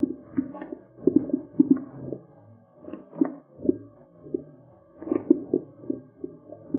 boiling pudding
boiling budyń sound (pudding?)